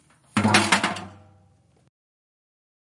Sampling deterritorializes the drums. It takes the static organization of percussion that became typical in mid-late 20th century pop music (snare, hi-hat, ride, tom, etc) and opens it up onto the acoustic surrounds. With the advent of electronics, recording and sampling technologies, a drum-kit can be anything: a construction site, a garbage dump, a trash can. Using field recordings as percussion turns the drums into a viscous and malleable fluid. It is this potential for transformation of instrumentation and timbre, that is to say, a new organization of the musical ensemble, that i find promising.
Trash Can Roll was recorded with a Tascam DR100 at UCSC.
drum-kits, field-recording, sample-packs